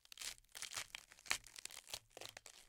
Flesh, intestines, blood, bones, you name it.
blood, flesh, intestines